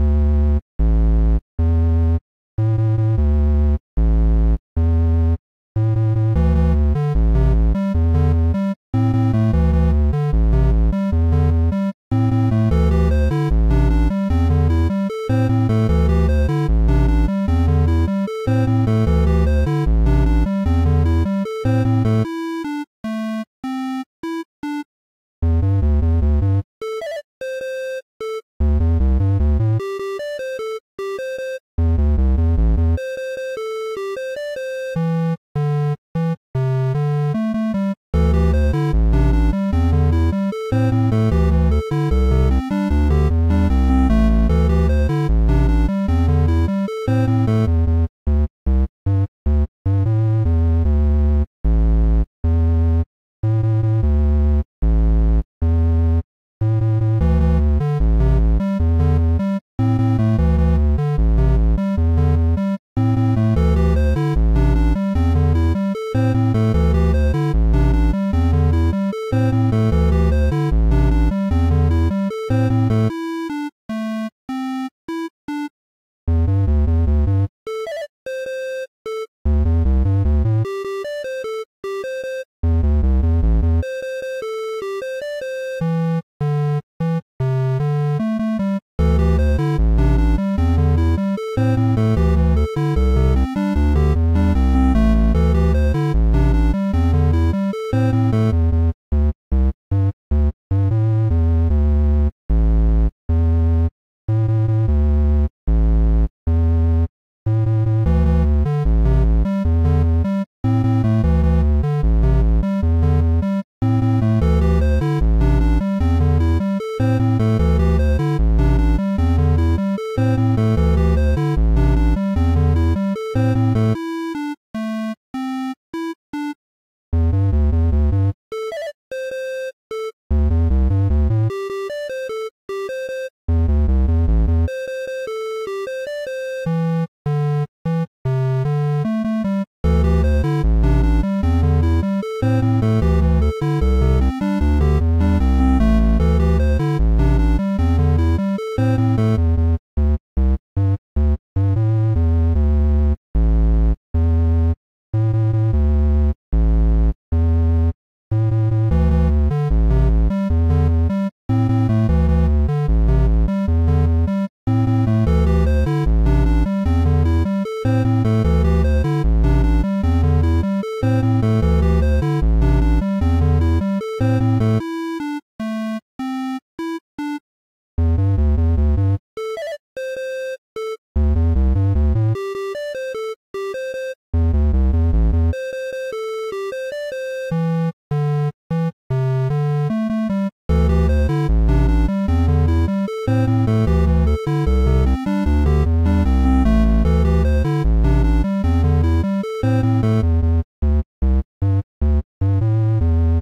Rivalry - 8 bit music loop
A short 8 bit music loop.